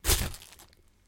Some gruesome squelches, heavy impacts and random bits of foley that have been lying around.